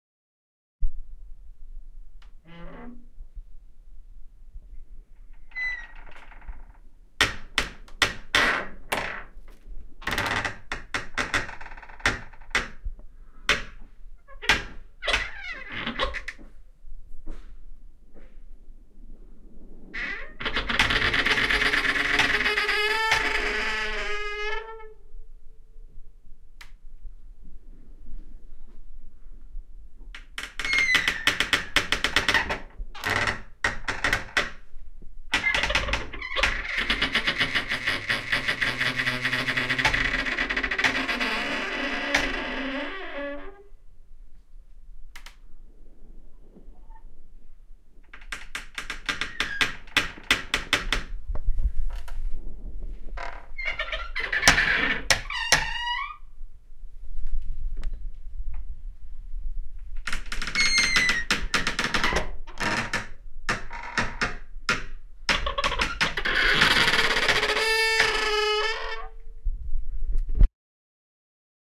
Squeaking Door
cacophonous, close, door, squeaky, cellar, wooden, squeeky, discordant, squeak, opening, Squeaking, open, gate, wood